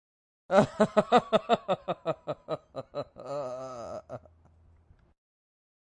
Male Short Laugh Crazy
A short laugh used in SCi CO FILMS "Raiders of the Lost Clam!!!" during the LUMBERJACK driving scene!
maniacal, chortle